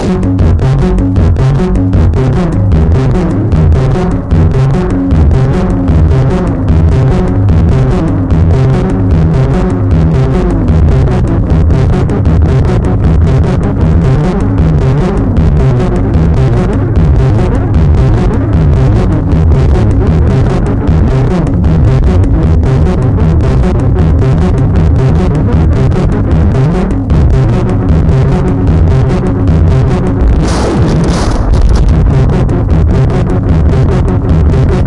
sound noise sample audio roil field recording